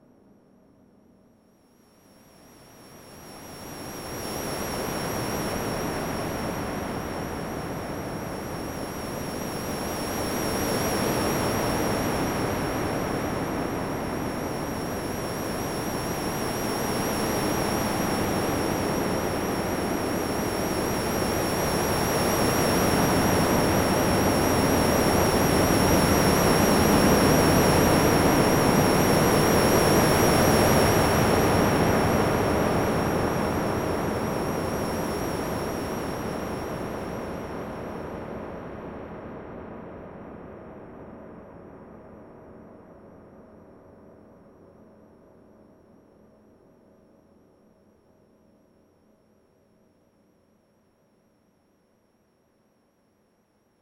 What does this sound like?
ill wind
A cold wind made purely with synth sounds - part of my Strange and Sci-fi pack which aims to provide sounds for use as backgrounds to music, film, animation, or even games.
synth, noise